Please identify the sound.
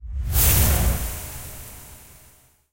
Skyrim Heal Start
Made for "Skyrim IRL" from Cyberkineticfilms. This is the start "sizzle" of the healing sound from Skyrim. Mixed from other sounds, it closely resembles the sound of the spell in the Game
spell, magic, scrolls, dragon, IRL, mage, elder, dovah, skyrim, dovahkiin